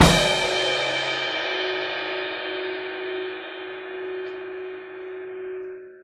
This Hit was recorded by myself with my mobilephone in New York.
Sample, LiveDrums, MobileRecord, Hit, Pre-Mastered